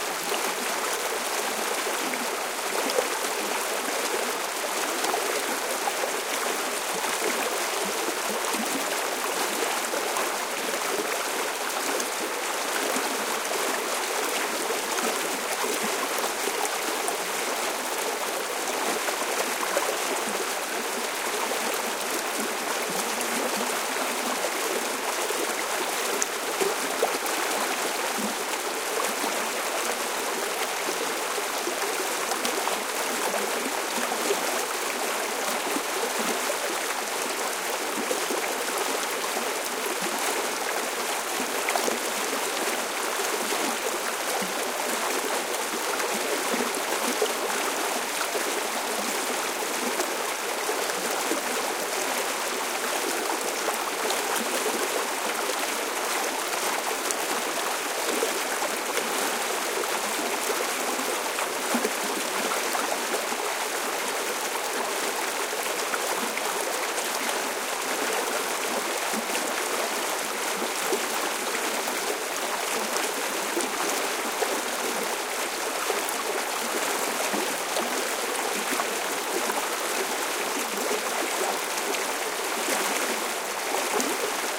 All in pack recorded today 3/29/14 on the Cataract Trail on Mt. Tam Marin County, CA USA, after a good rain. Low pass engaged. Otherwise untouched, no edits, no FX.
babbling, gurgle, flowing, creek